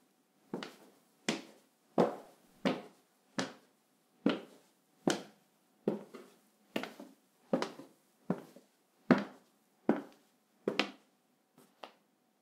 Walking steps up a mildly creaky staircase.